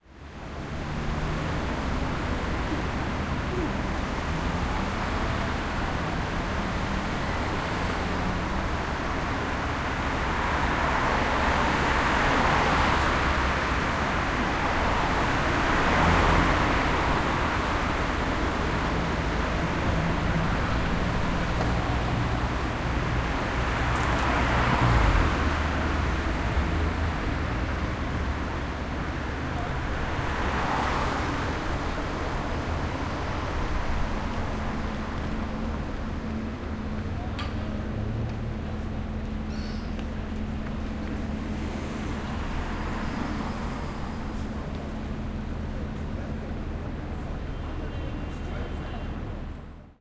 City Street Sounds - Auckland, New Zealand
This was recorded standing right next to the road on Quay Street in Auckland, New Zealand. Recorded on Wednesday 17th June 2015 at 4pm. It was a cloudy day with no rain.
You can hear cars driving past, people talking in the distance, footsteps and seagulls.